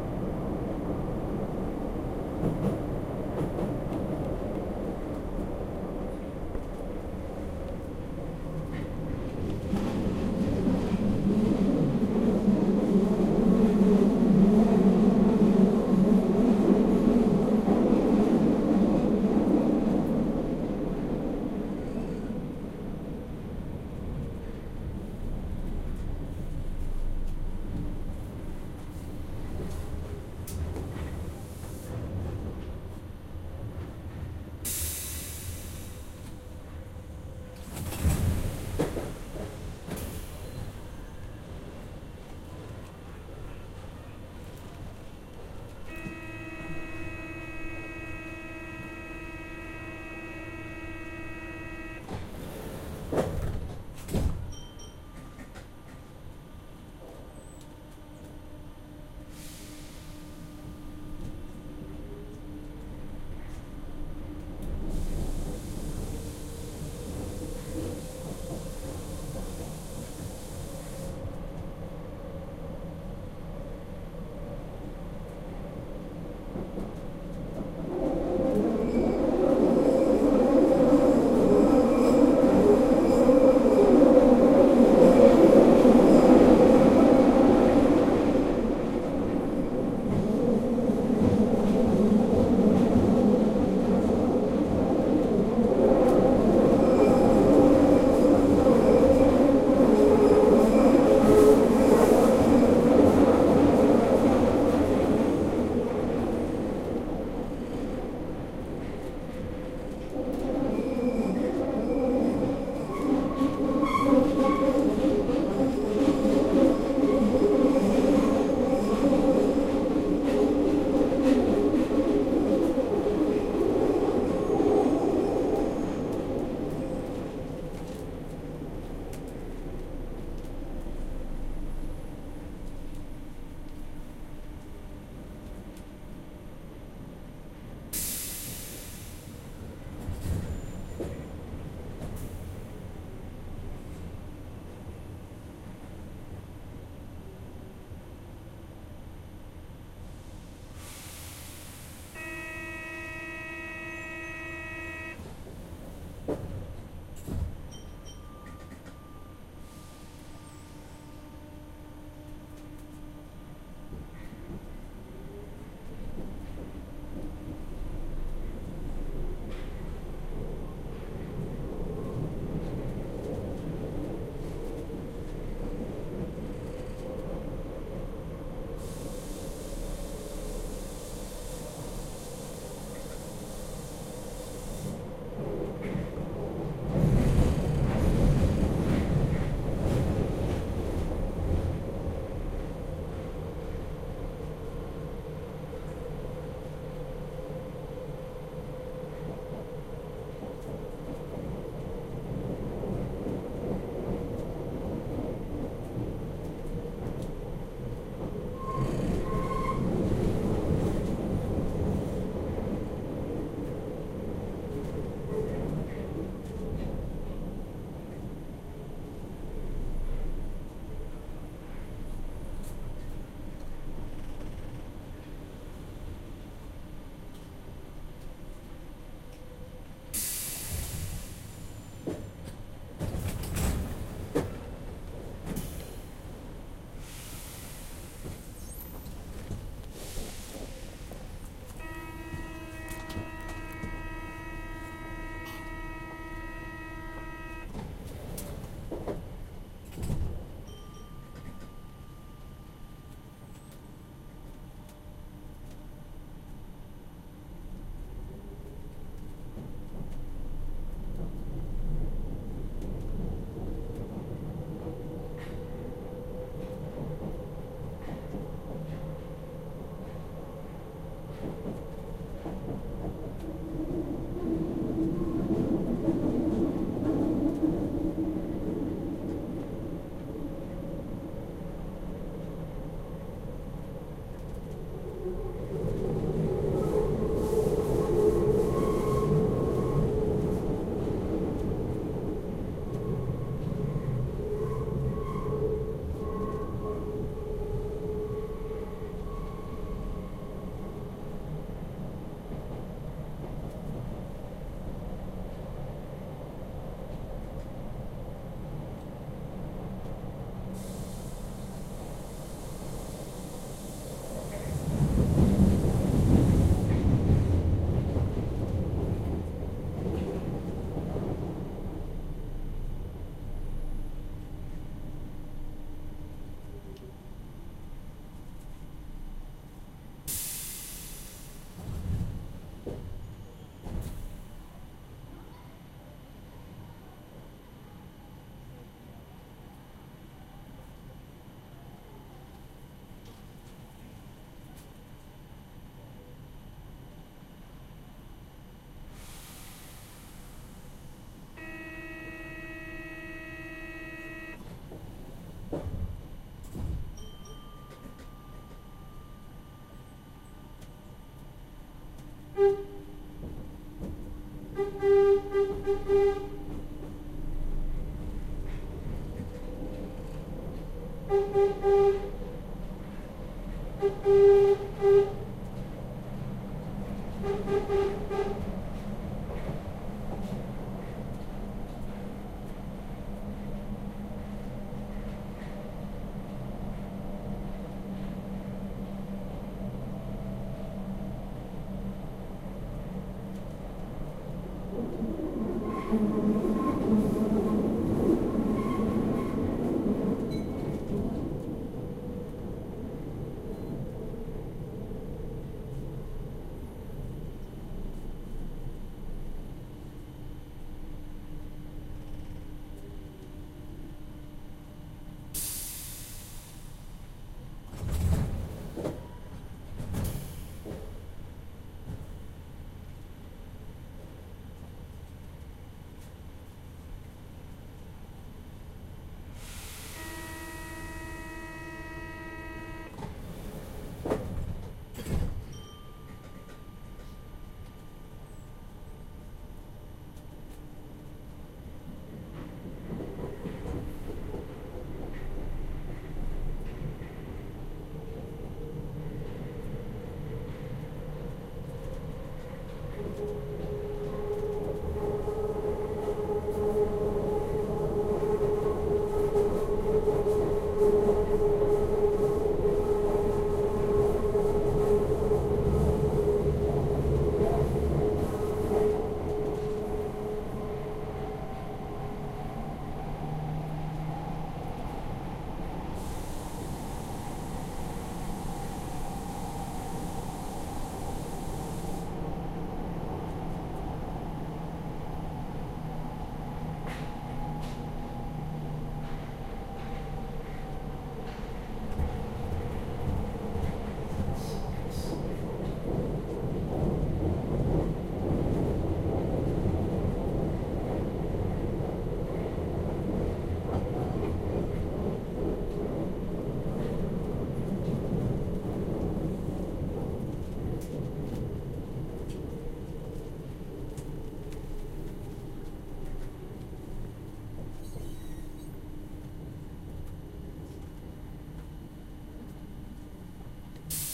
Metro-Ligne-7-Palais-Royal-Jussieu
Enregistrement sur la ligne 7 entre Palais Royal et Jussieu
metro, paris, subway, train, tunnel, underground